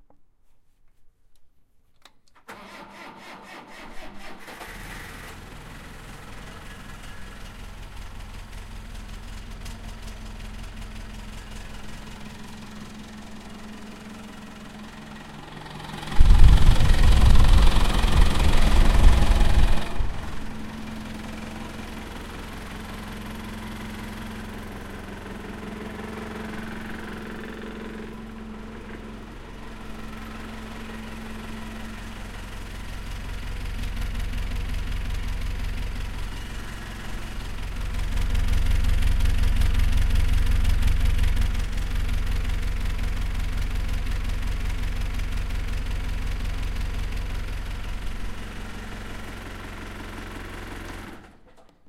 Industrial Forklift Stall Then turn over
Stereo
I captured it during my time at a lumber yard.
Zoom H4N built in microphone.